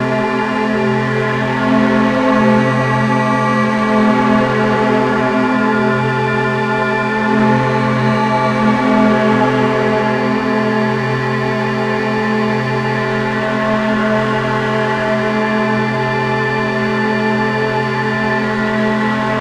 dly saw1
heavily processed sounds form the symbiotic waves module by pittsburgh modular. Filtered through the Intellijel Atlantis Filter. Effects were minifooger chorus and occasionally strymon delay or flint. The name give a hint which oscillator model and processor were used.
analogues, waves, modular, hybrid, paradise, atmospheric, pittsburgh, analog, eurorack